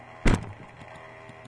Another hit sound.